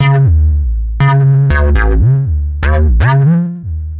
DeepBassloop8 LC 120bpm
Electronic Bass loop